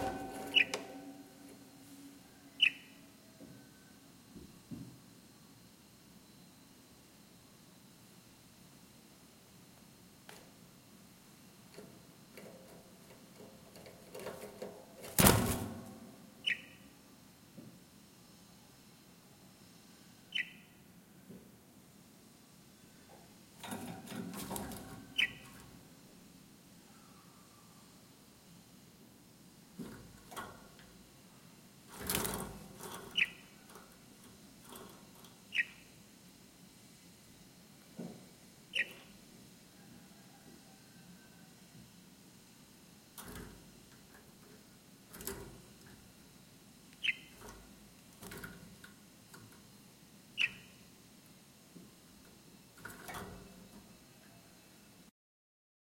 how do u say "periquito" in english?
h4n X/Y